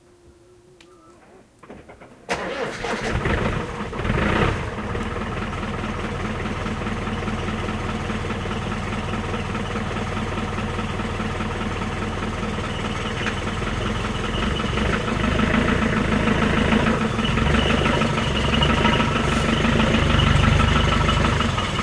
20070406.car.engine.00
noise of a car engine starting in the middle of the night (a SUV but don't know exactly which model)